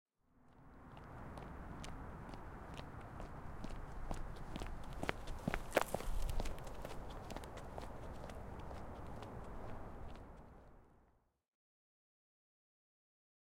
23 hn footstepsSneakerConcrete
Sneaker footsteps on concrete walkway.
concrete, footsteps, sneaker